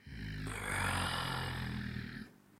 Good quality zombie's sound.